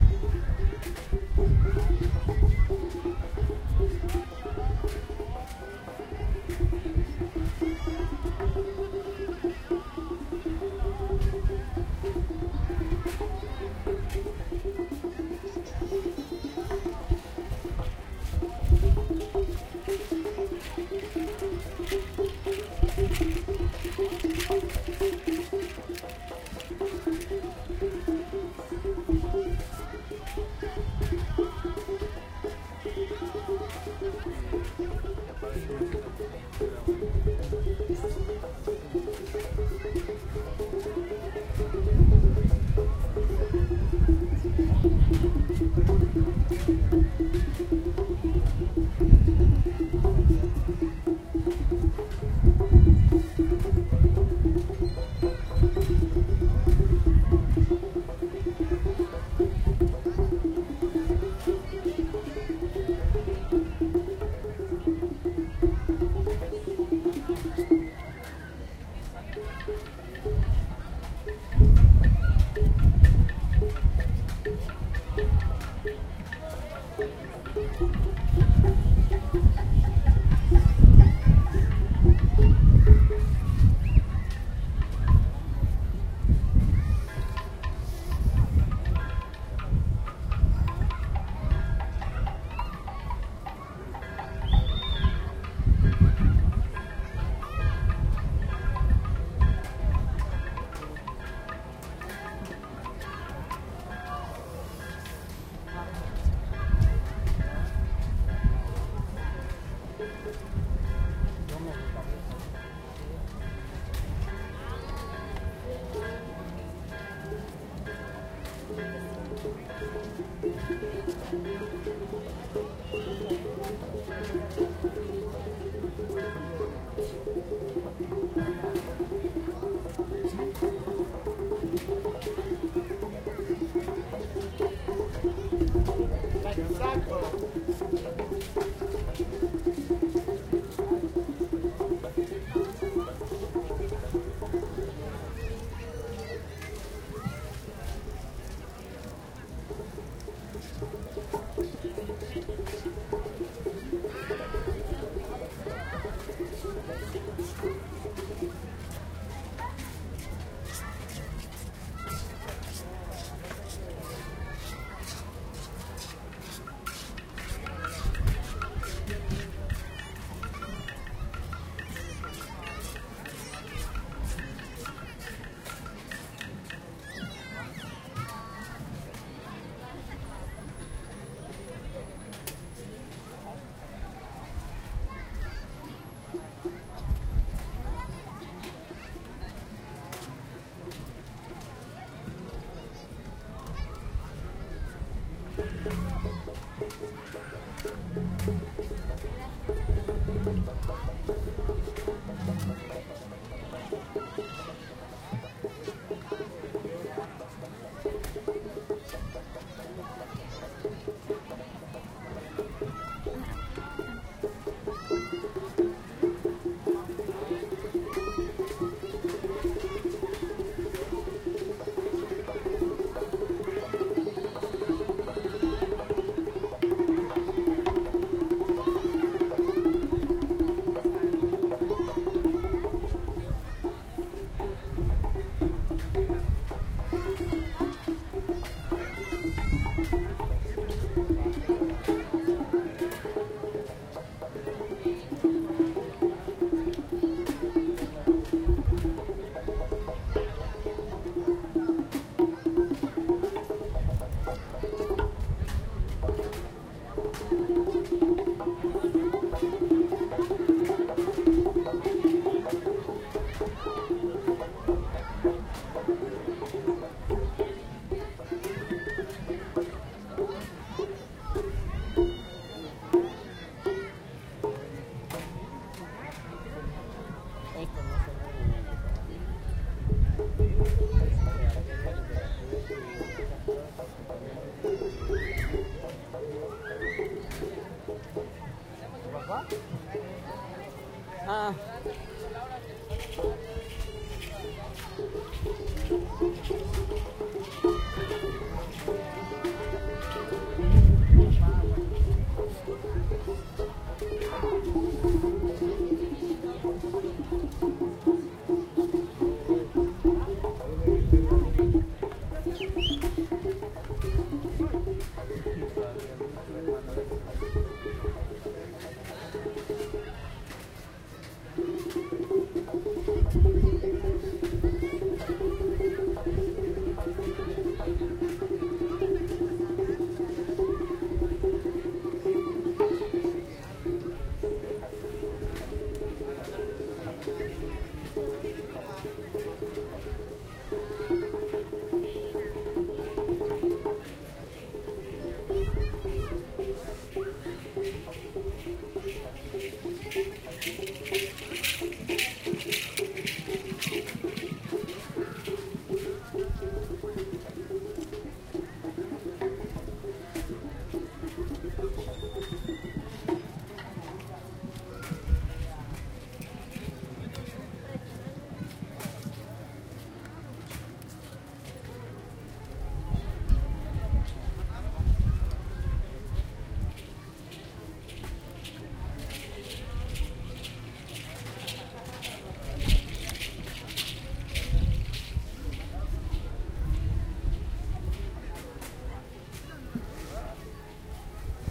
Playa del Carmen band warming up
A local music / dance troupe warming up for the daily public show in the evening. It's a little bit windy. But some interesting percussion textures.
Beach, drums, percussive